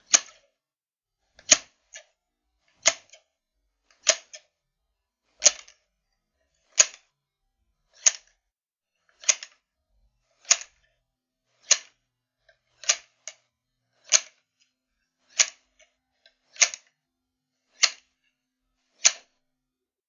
hedge hand clippers
Hedge clippers (hand operated vs motorized) for Foley sfx. (These hedge clippers are from my shed)
hedge, foley, trimmers, tool, garden, tools, hand, clippers